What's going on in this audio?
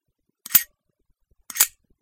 Grenade pin pull
While working with explosions, I had to recreate the elements for throwing a grenade. Well, here it the pin pull. I scraped a screwdriver on a wrench for the pull and clicked a lock-wrench around for the click part of the pin coming loose.
plug, pin, bomb, explosion, active, pull, boom, release, grenade, timed